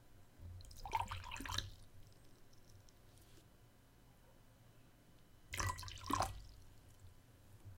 Water dropping in a glass
drop, dropping, glass, serving, water, liquid